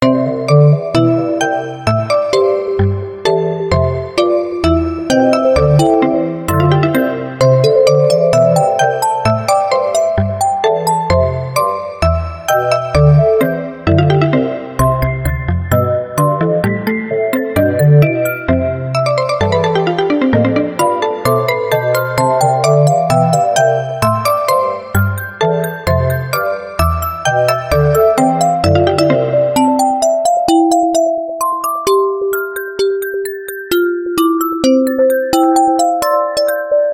A loop from a Classical piece I composed for my Grandchildren Alice and Alexander. It is how I remember the feeling of that first fresh snowfall in upstate New York.
130, BPM, C, Classical, C-Major, loop, major, percussive